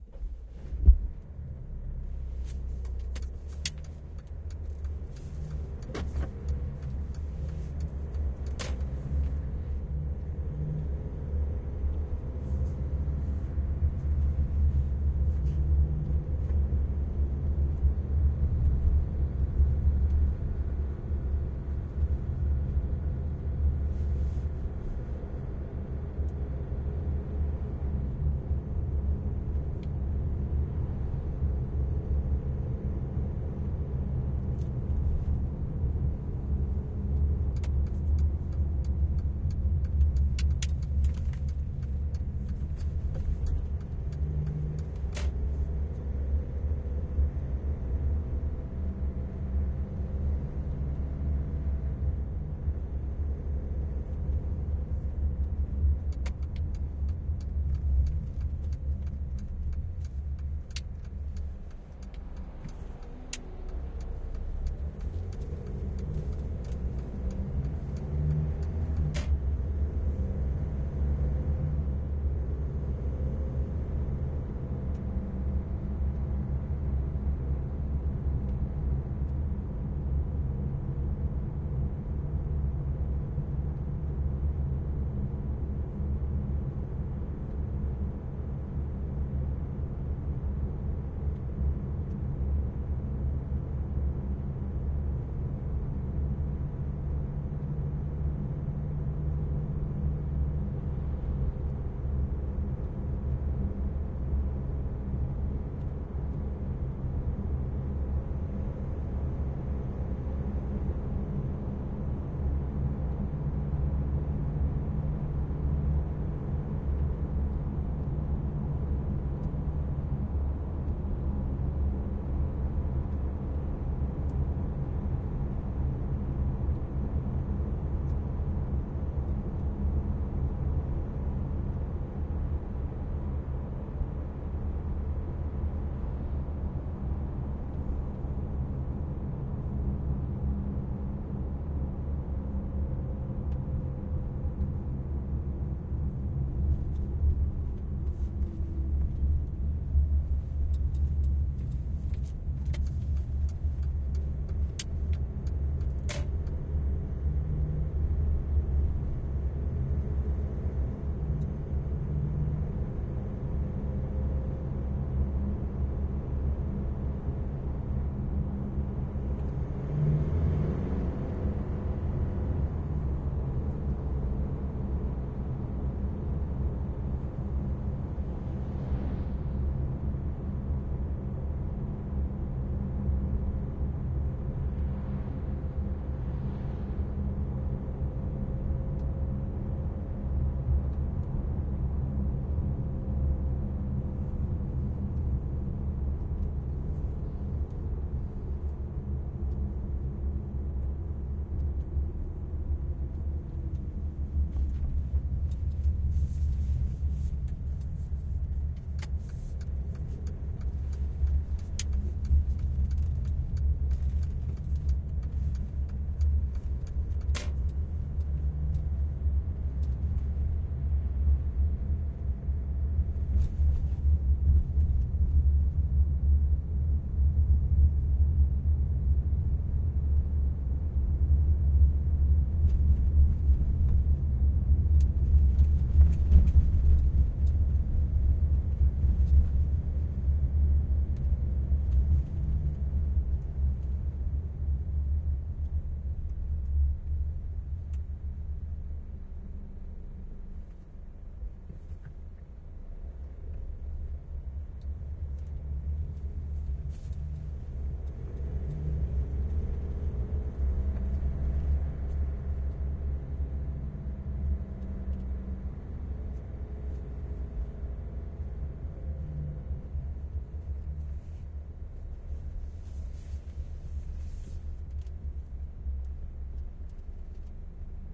MERCEDES interior driving normal speed
This recording i did to a short film, it is a miks of several microphones in motor biheind the car and near the wheel. I used dpa dpa lavalier mkh 406 schoeps cmc 5 and mkh 60.
drive, engine, car, vehicle, motor, mercedes